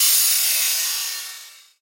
foil whap2jcg2016
A sound i call a Ewhap. good for electronic, industrial and edgy compositions.
techno, electronic, cymbal, glitch, synth, drums, metal